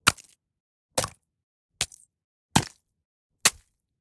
Mining Mineral Ice Picking Crystal
crystal, farm, gathering, harvest, icepick, mine, mining